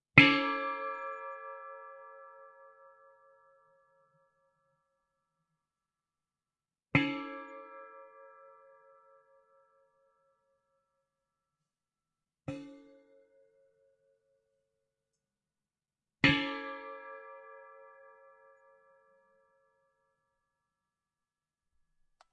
metal rings 03
metal bowl struck at different intensities. mostly low rings.
metal, ring